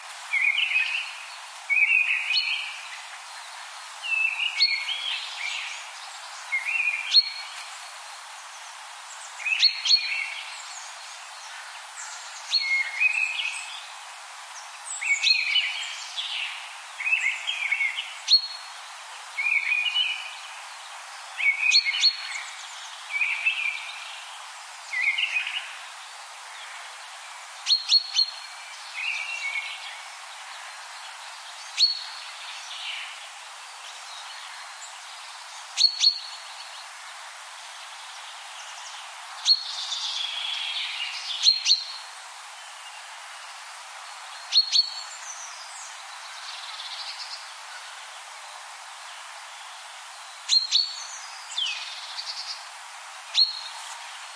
Walk through the woods in the spring in Denmark
birds, through, walk, woods